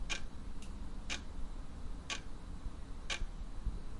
clock ticking, can be used as a loo